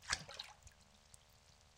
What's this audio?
A small stone dropped on water